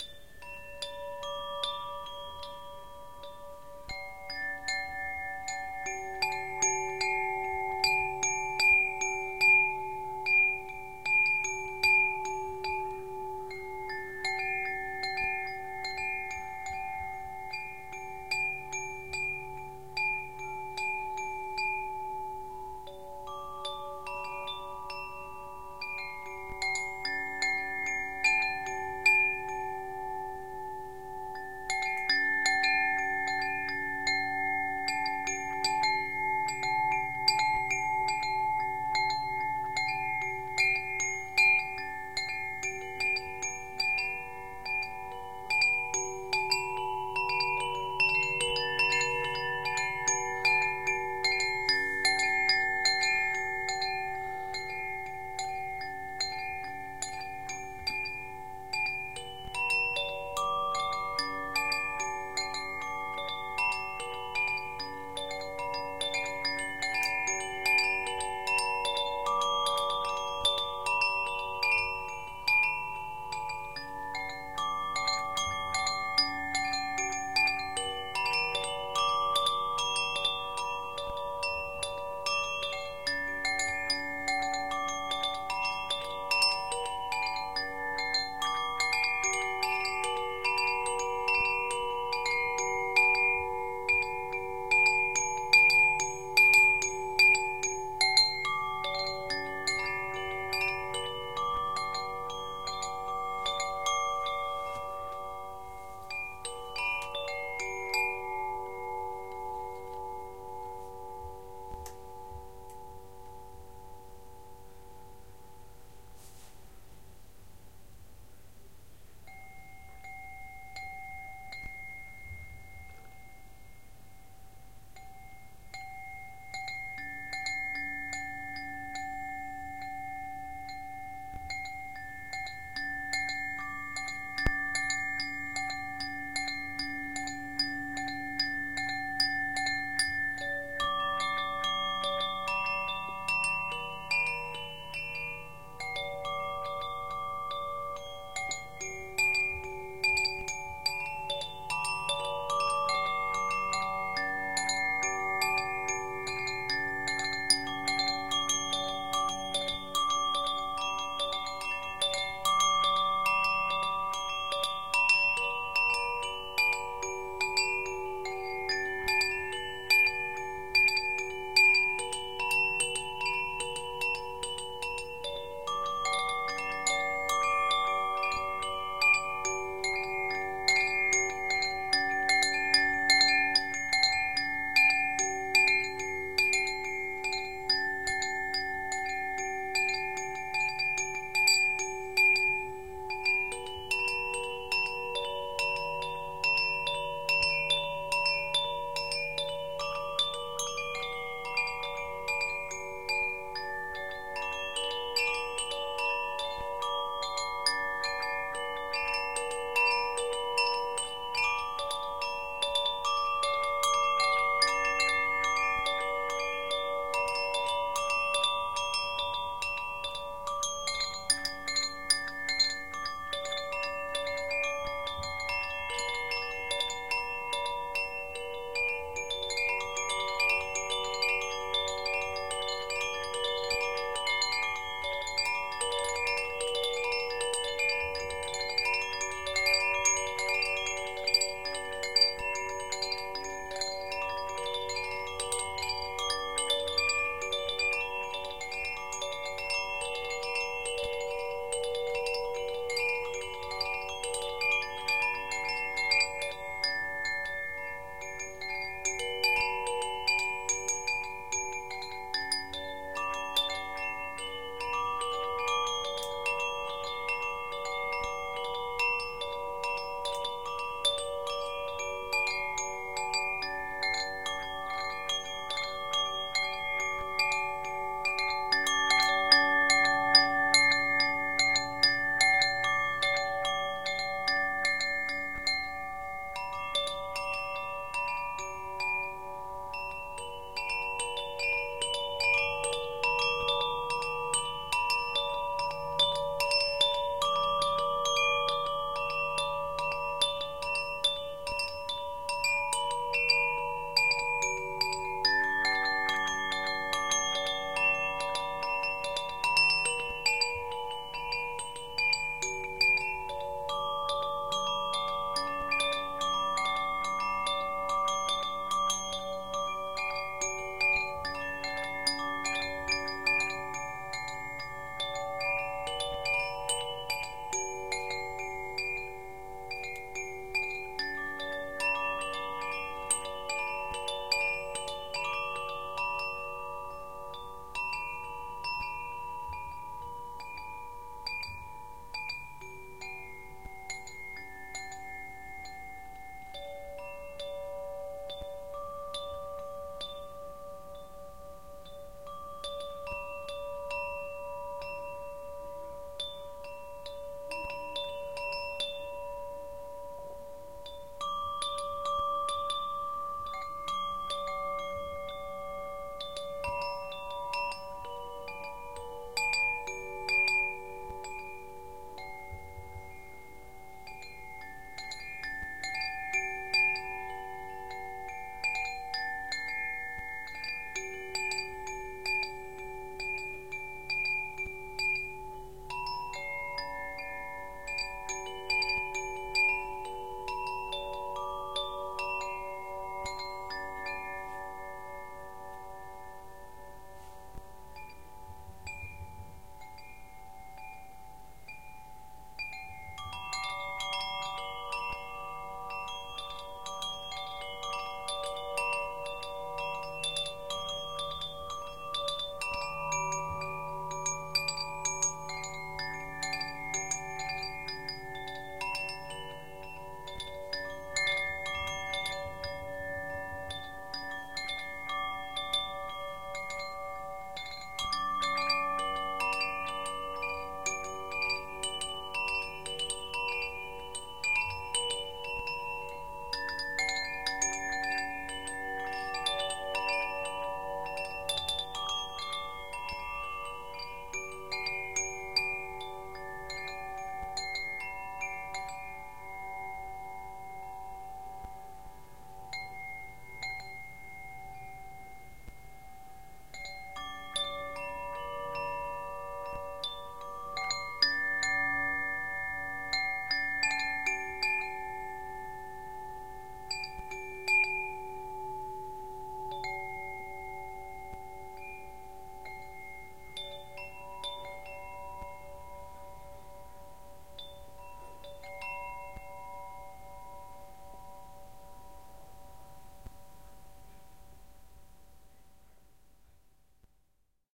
Testing combination of a new Rode NT55 (matched pair with cardio capsules) and Olympus LS-100 (directly via XLR and +48 phantom). Since these are new capsules, I don't know if this clicking sound on one of the capsules - is something that will be gone after some time of work (capacitator release/charge), or if this is faulty capsule. It is the capsule, because I moved it between cables and mic bodies and inputs and devices. Olympus requires gain at 7+ on Hi settings. But these mics are great anyway, so if the capsule is damaged, I will rather opt for replacement than return.